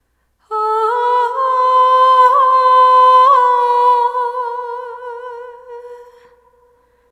short female vocal - katarina rose
This is an unused vocal scrap leftover from a project of mine.
Recorded in Ardour with the UA4FX interface and the Behringer C3 mic, on my piece of crap laptop.
voice, new-age, female-vocal